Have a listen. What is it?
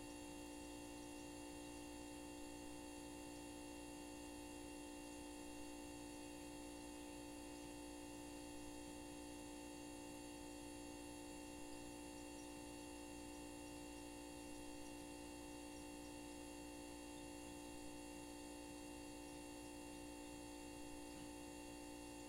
Box Freezer Loop
This is the sound of a box freezer. Loopable.
drone, field-recording, freezer